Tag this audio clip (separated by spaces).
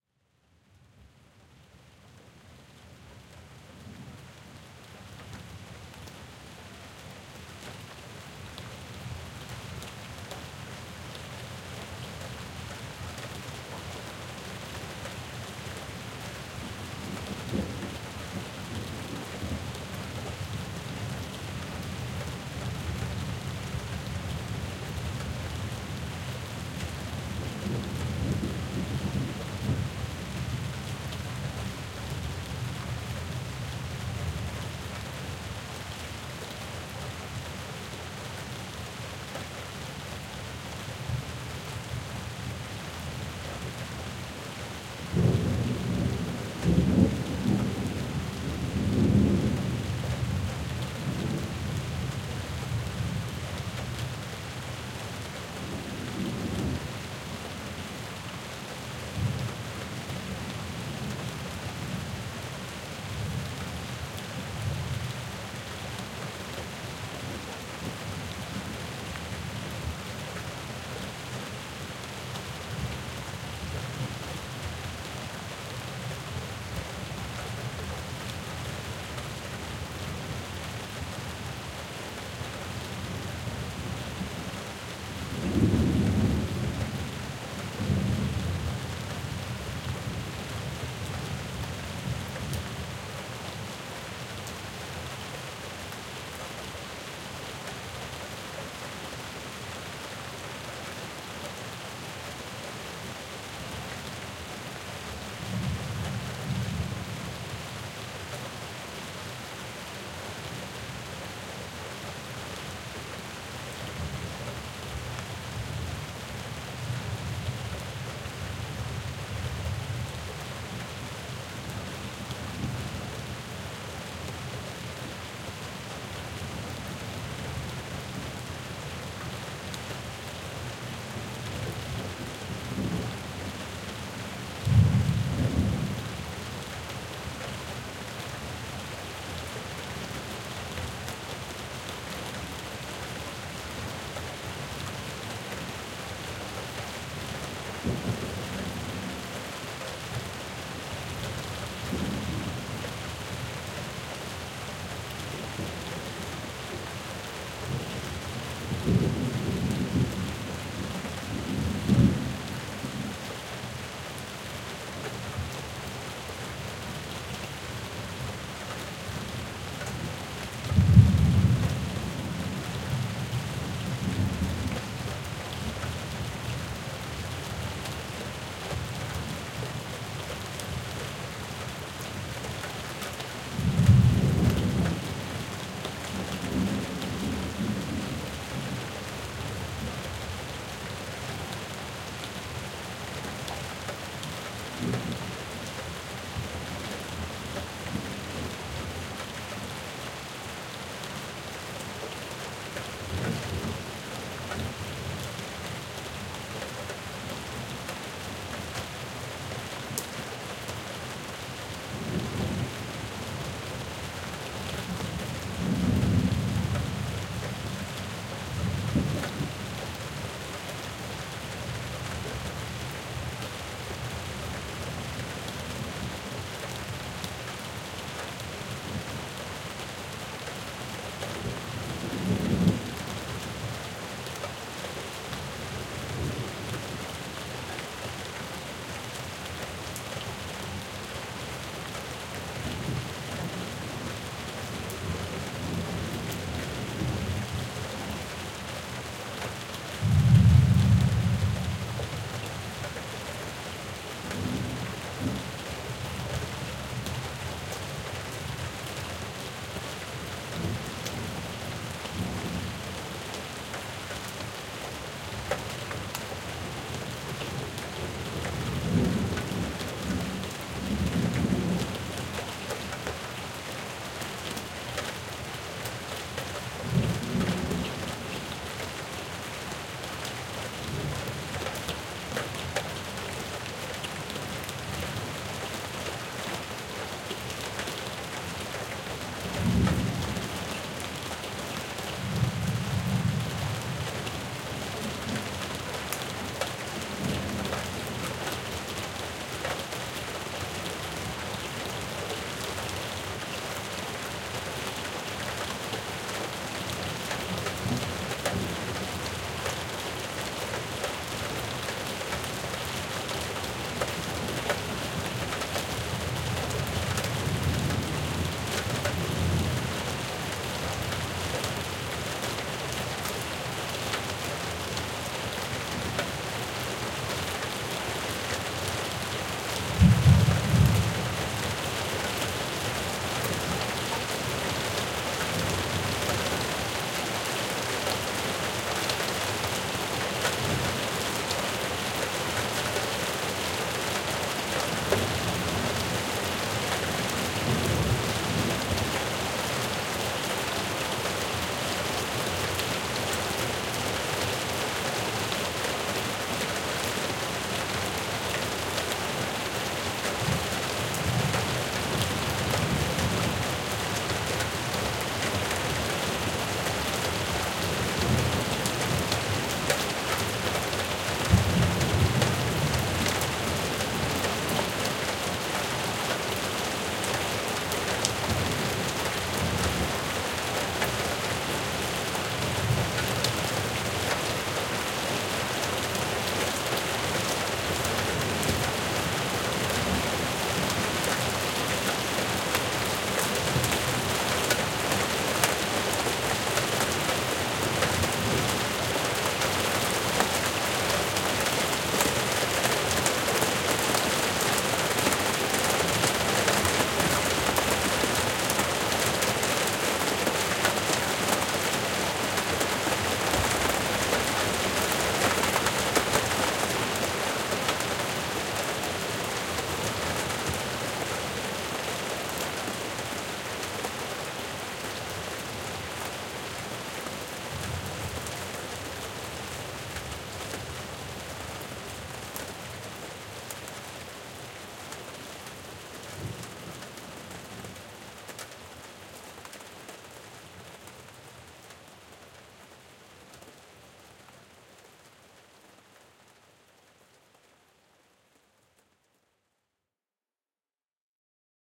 Field-Recording Rain Raindrops Rainstorm Stereo Storm Thunder Thunderstorm Weather